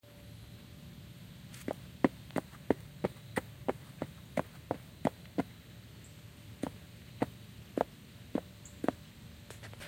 2-speed walking
This is the sound of shoes walking on a wooden surface. It has 2 speeds.
This would be good for your LEGO Stop Motion Animations, although you can really just use it for whatever you want.
wood, shoes, footsteps, feet, Walking, slow, fast